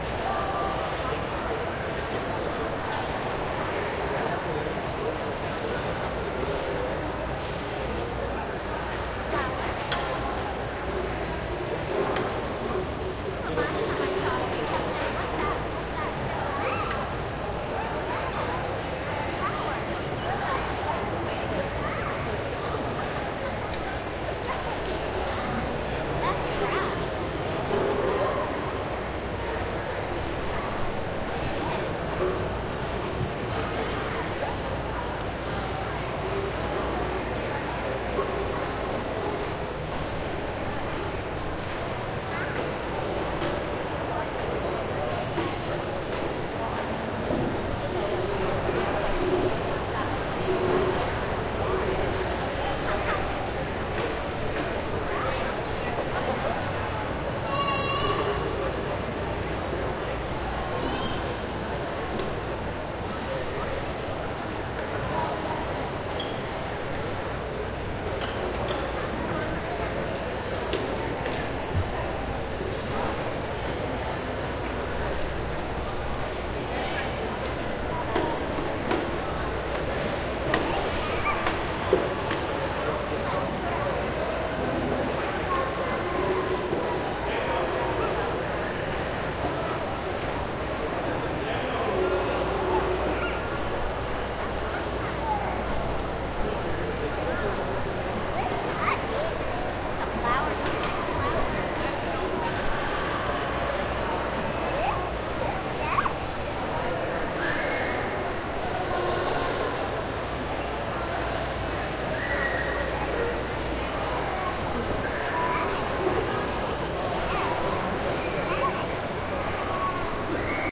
Mall Food Court 02
Part of the Dallas-Toulon exchange project.
Time: April 1st 2011, 12:25
Ambiance: kinda busy, yet not too loud.
Density: 6
Polyphony: 6
Chaos/order: 5
Busyness: 6
Food court, wide open space, lots of people eating
ambience, food-court, mall, busy, dallas